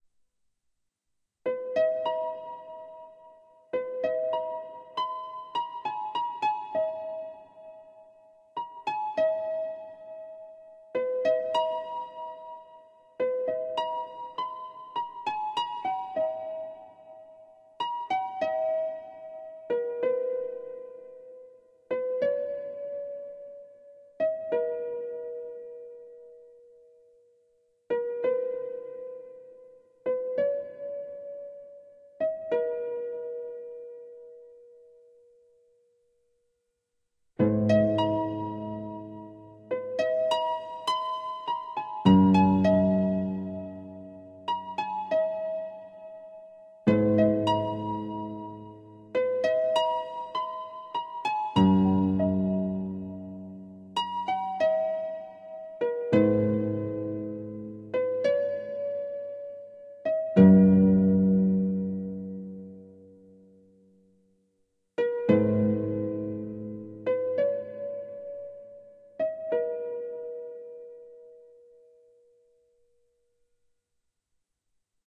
relaxation music #20
Relaxation Music for multiple purposes created by using a synthesizer and recorded with Magix studio.
relaxation, meditative, meditation, harp, relaxing, slow